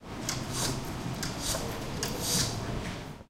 curatin down
Sound of a curtain going down with difficulties in library.
Recorded at the comunication campus of the UPF, Barcelona, Spain; in library's first floor, next to consulting computers.
curtain-down, campus-upf, library